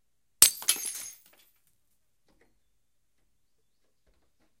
Me dropping a vase off my deck onto a concrete patio.